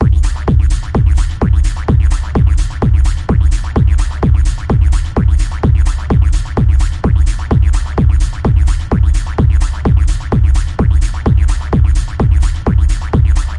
Dark Techno Sound Design 06
Dark Techno Sound Design
Dark, Techno, Sound, Design